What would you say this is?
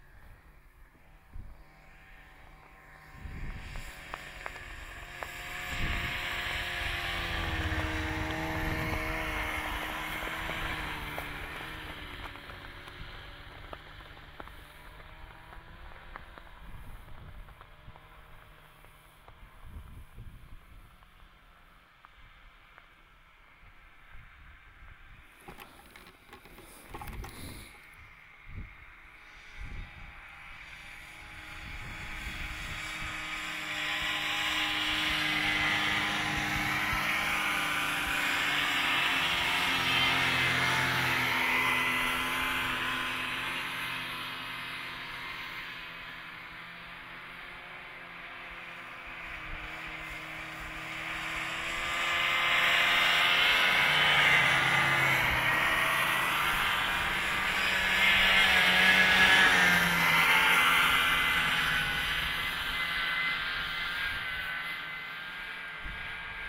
snowmobiles pass by nearish2
snowmobiles pass by nearish
snowmobiles, pass, by